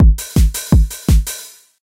A 909 dance/house style break/loop, it's too basic, and isn't a real loop, you need to set the loop points.
break, 909, style, 166bpm, loop, dance